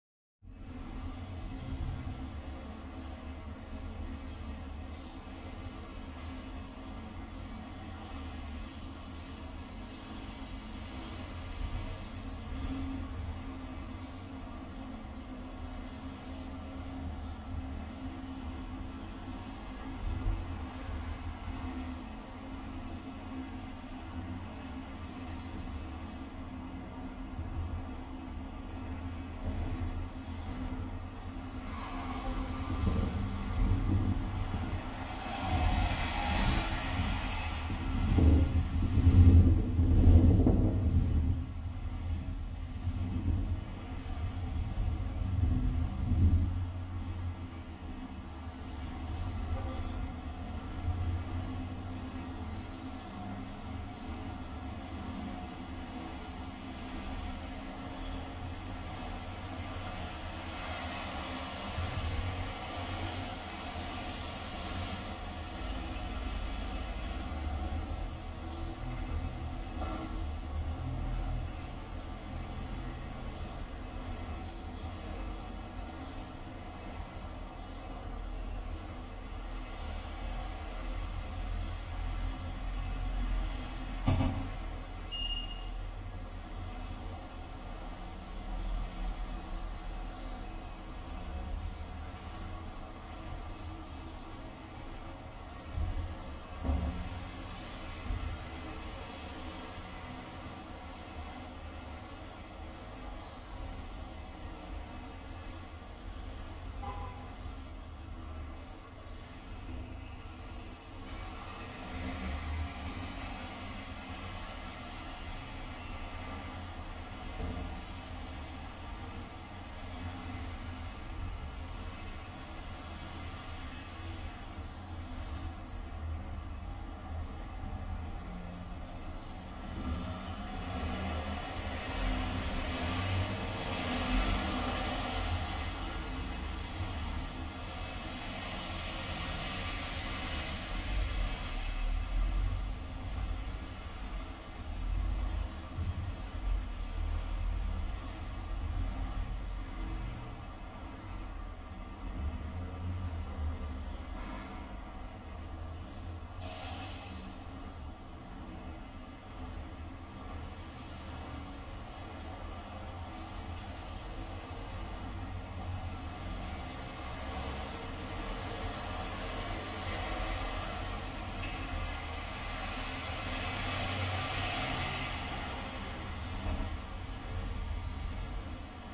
Lonely cars
a recording of cars edited to feel lonely
edited, lonely, cars